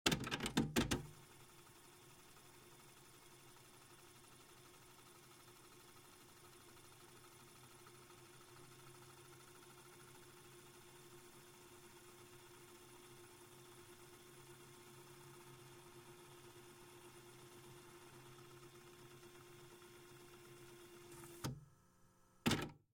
cassette deck fast forward audio cassette 01
Sound of a cassette deck, fast forwarding an audio cassette.
Recorded with the Fostex FR2-LE and the Rode NTG-3.
audio, tape, cassettedeck, forward, player, deck, fast, cassette, recorder, tapedeck